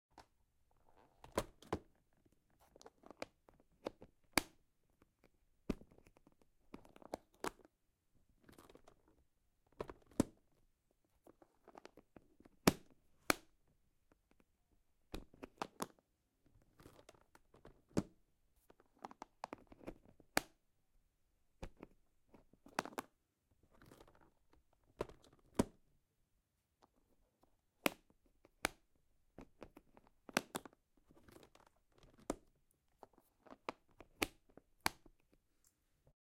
Plastic pencil case open and closing
A Plastic space case latch opening and closing. Recorded using Zoom H6 with an XY capsule.
OWI, Plastic-case, Plastic, field-recording